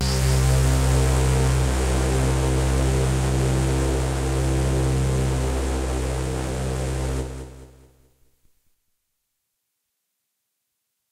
Cutting synth
I used this sound years ago as an opener to one of my tracks. Very synthy and electronic sounding. Made with a Roland JV-1000.
buzz, buzzing, delay, drone, electricity, electronic, reverb, sawtooth, synth, synthesizer